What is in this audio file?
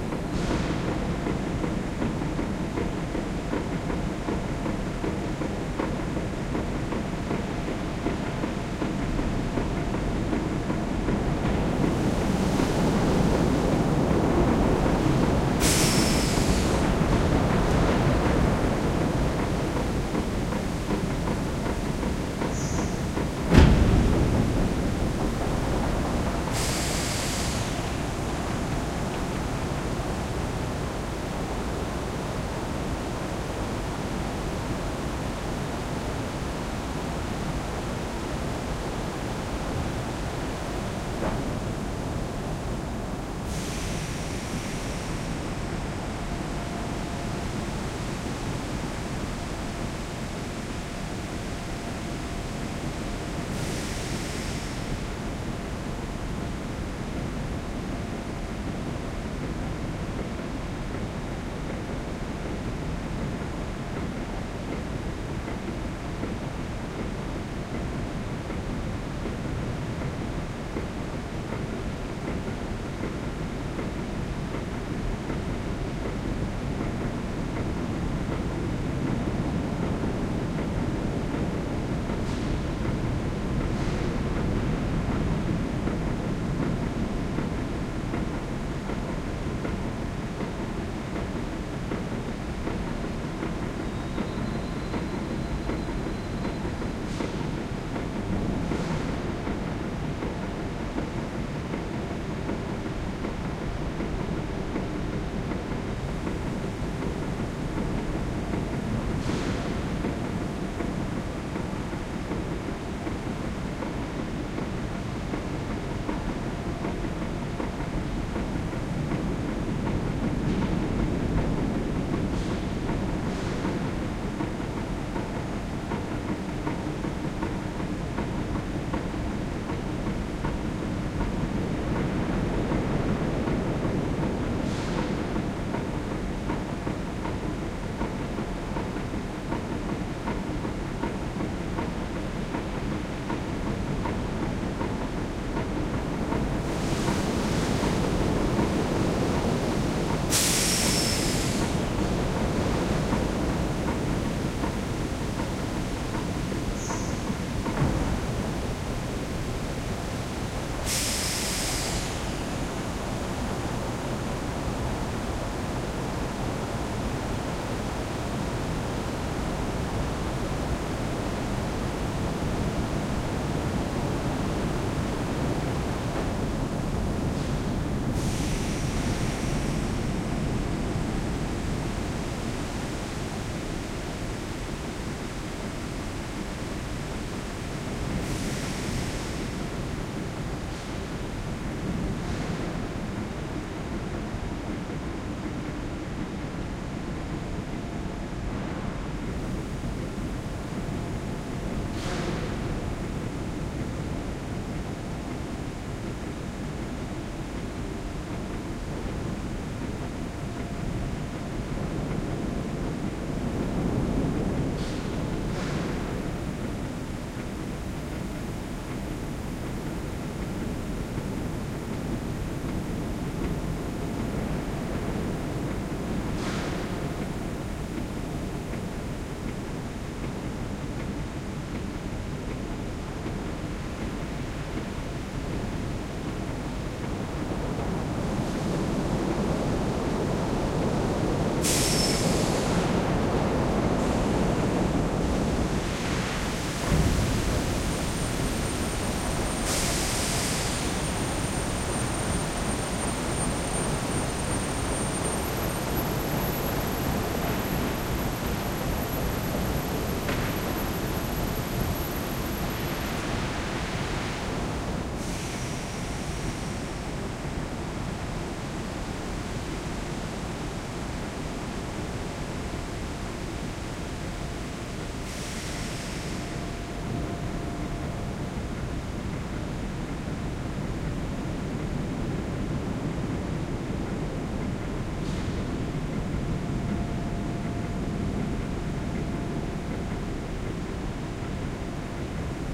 Arcelor warmwalserij
This recording is a mix of a mono recording, done with a Sanken CS3e on a Roland R-26, and a stereo recoring, with pair of DPA 4060 on a Sound Devices 702, of the same scenery, yet from a different listening point. The mono and stereo file were then put together in reaper.
The recording was done in the hot strip mill of Arcelor Mittal on the 6th of march 2015.